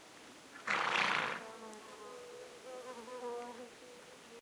20070325.distant.snort
a horse at some 50 m snorts, an insects flies (left to right), wind in trees (right). Sennheiser ME66 + MKH30 into Shure FP24, recorded in Edirol R09 and decoded to mid-side with Voxengo VST free plugin.
field-recording, horse, nature, south-spain, spring